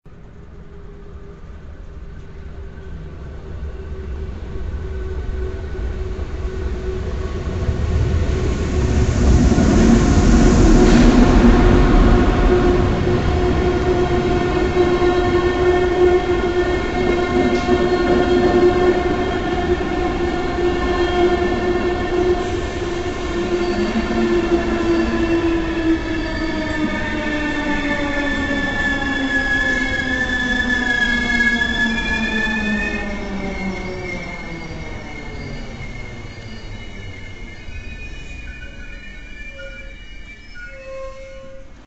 Incoming Train using brakes
Incoming Train With fade in and out at the beginning and end.